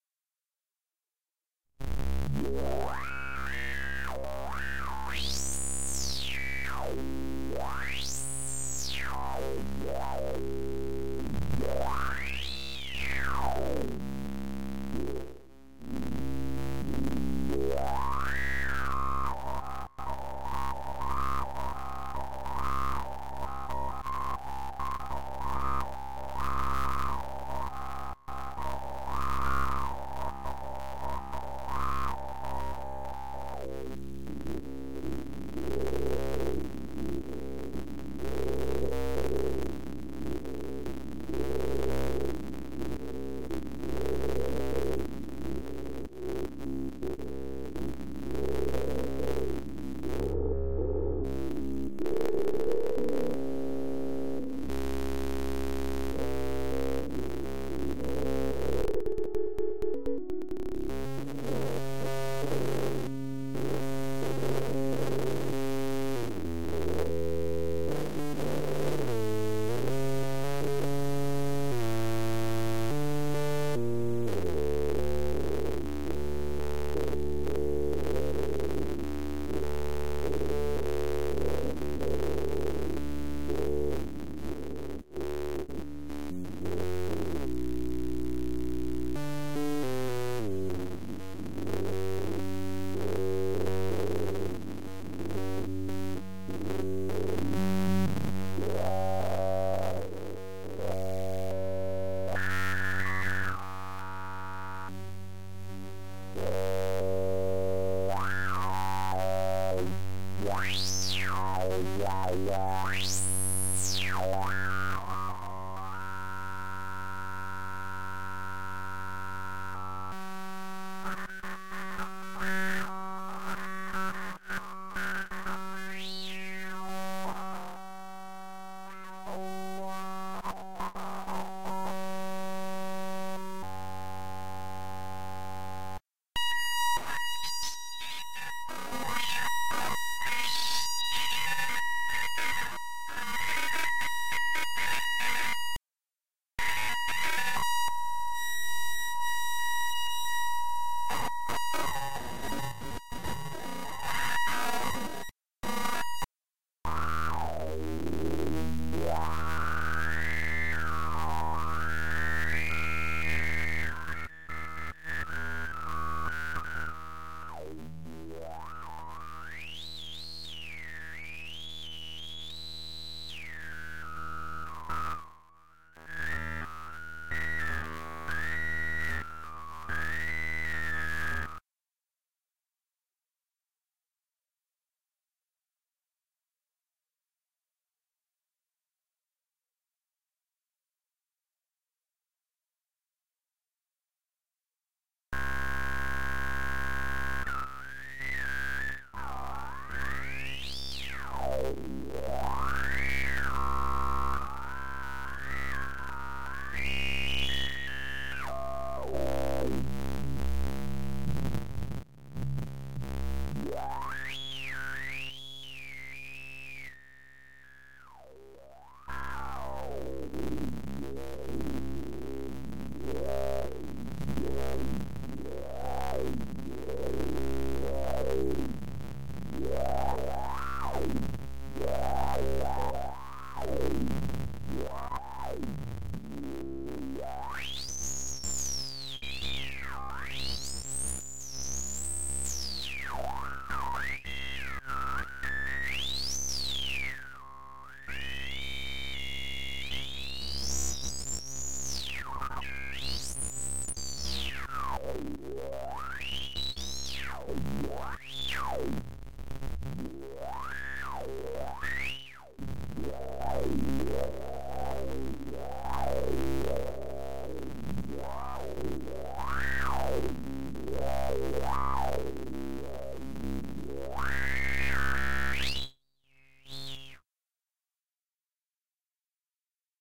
A long series of granular synthesis glitch-style oddness, could be used for interrupted transmissions, tuning in an old radio, galactic strangeness, and the like